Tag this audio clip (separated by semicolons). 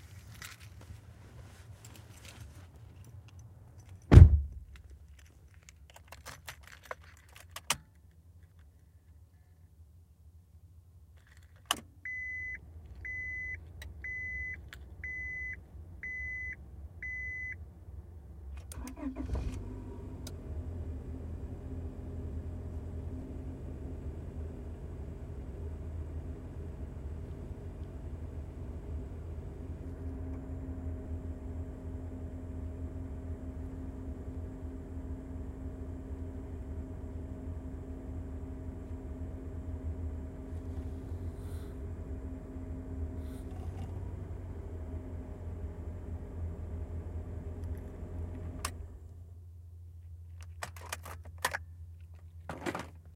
vehicle; ignition; starting; start; automobile; starting-up; engine; idle; car